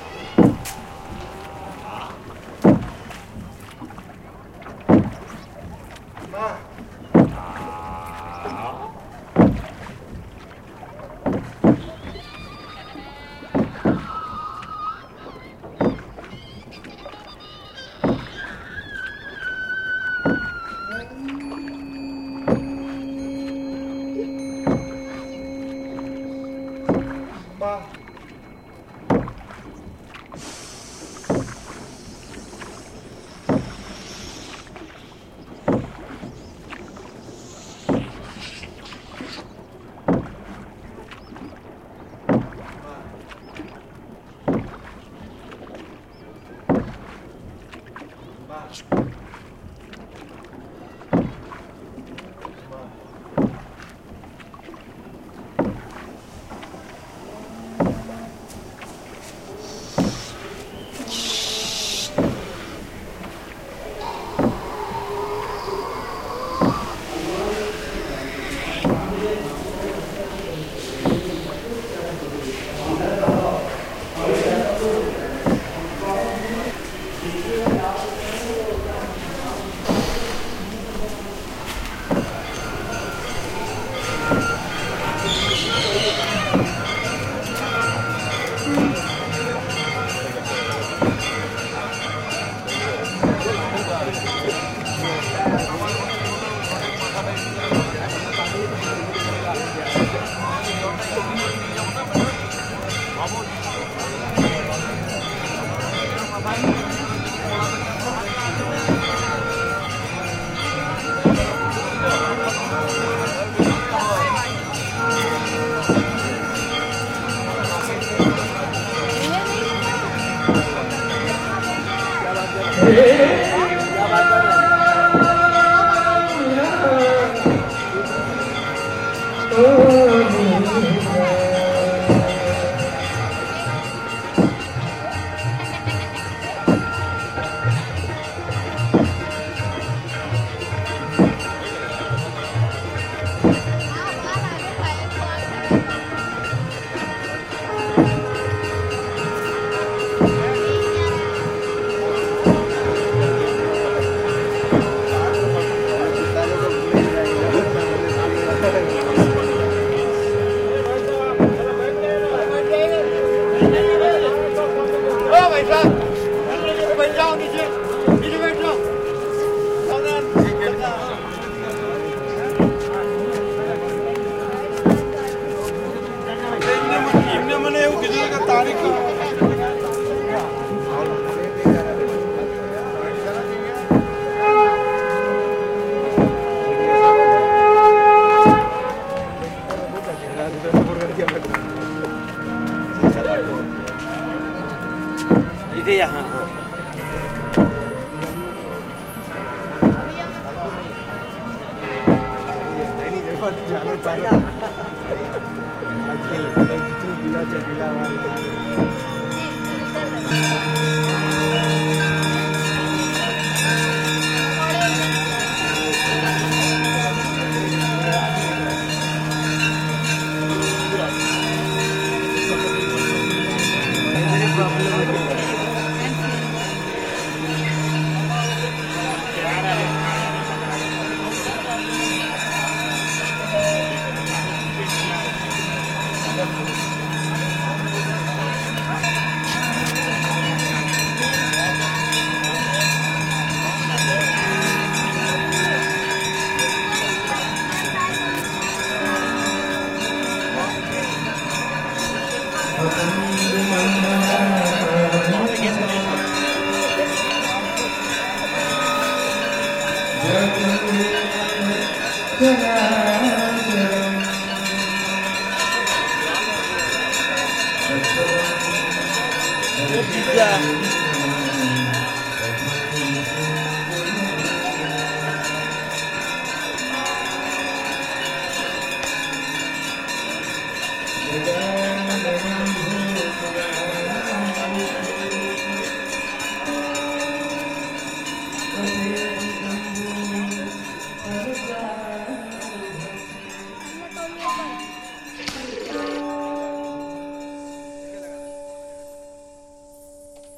Zone B 171224 VARANASI 02
mixing of three tracks around a ceremony on the banks of the Ganges in Benares (India)
boats, ceremony, river